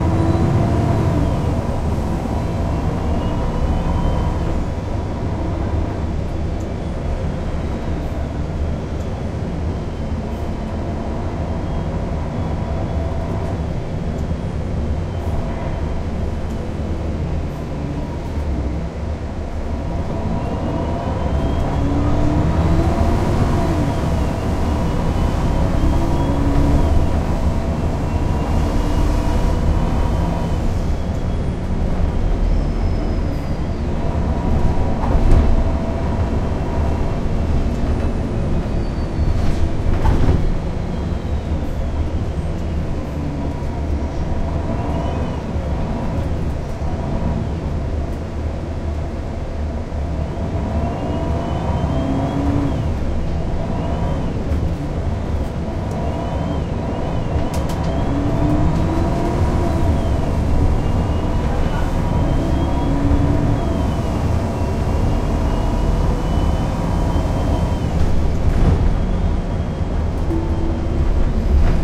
berlinSummer-DrivingBusM100
ambient, berlin, bus, capitol, city, drive, driving, motor, noise, pd, surrounding, urban, vehicle